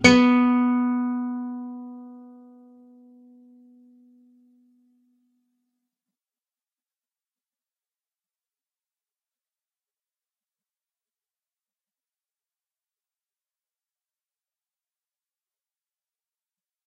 Clean B str pick
Single note picked B (2nd) string. If there are any errors or faults that you can find, please tell me so I can fix it.
acoustic, single-notes